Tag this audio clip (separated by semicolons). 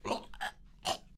choke; cough